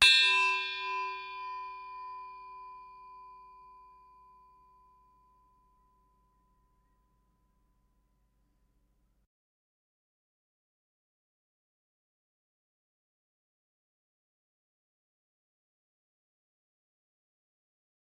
Heatsink Large - 12 - Audio - Audio 12
Various samples of a large and small heatsink being hit. Some computer noise and appended silences (due to a batch export).